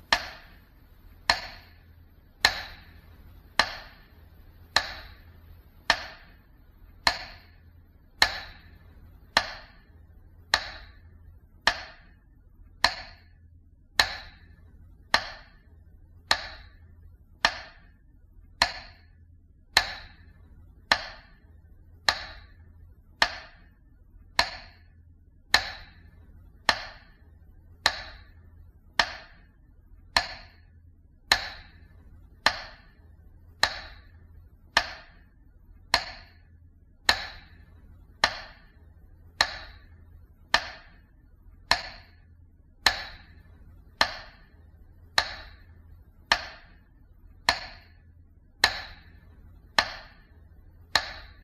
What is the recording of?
metronome saund,recorded on the zoom h5 at home
metronome, timer